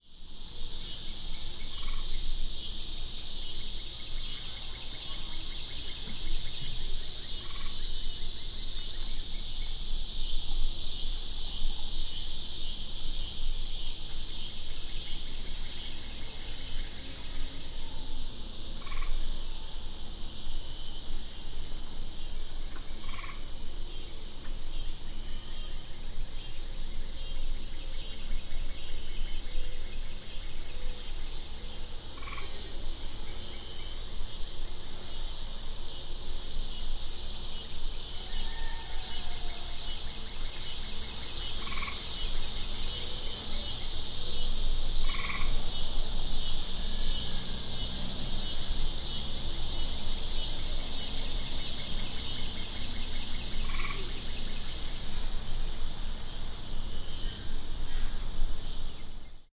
Ambience Farm 04
ambience, farm, field-recording